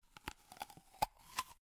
biting appile
Apple getting bitten